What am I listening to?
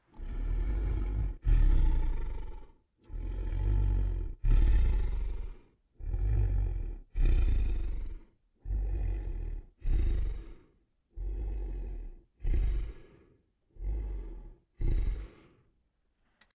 Two ,Lightweight growl of a dragon or monster etc